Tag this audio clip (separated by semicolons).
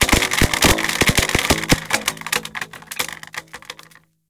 dropping; plastic; request